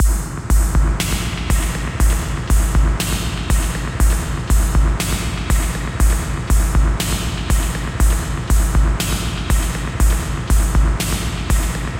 drum with a bunch of reverb
drum, dub